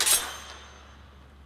Eleventh recording of sword in large enclosed space slicing through body or against another metal weapon.